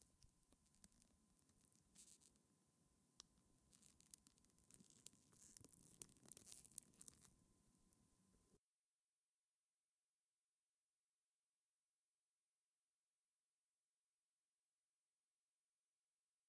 Plastic burn
fire, plastic, burn